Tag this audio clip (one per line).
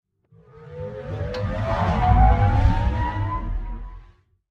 foley
recording
sampling
train